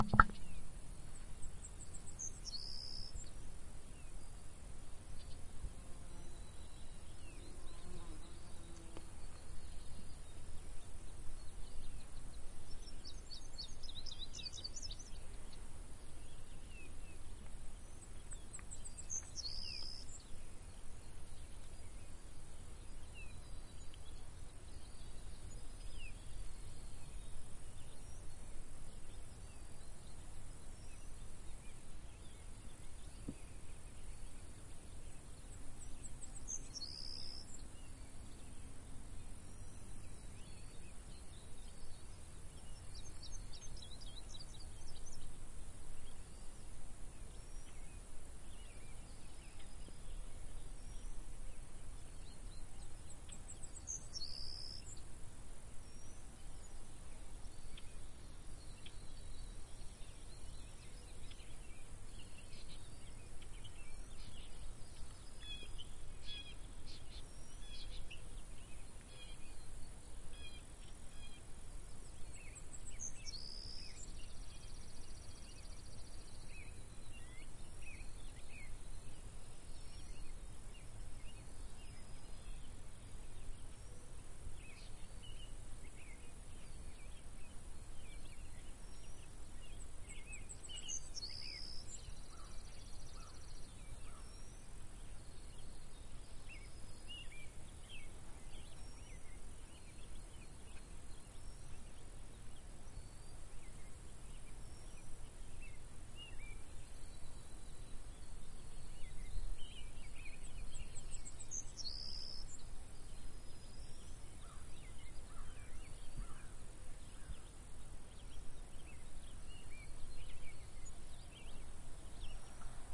Hot Day Insect Air with Birds
insect, birds, hot, air, day